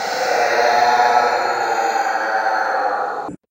a weird sound made in Audacity, created with some effects and noises